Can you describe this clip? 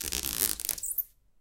Squeaks made by running a finger across a stretched plastic grocery bag
plastic, squeak